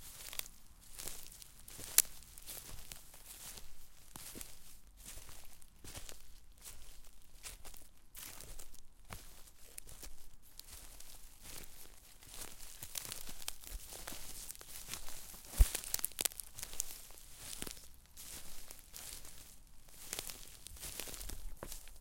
Fotsteg i gräs
Footsteps in grass. Recorded with Zoom H4.
grass, footsteps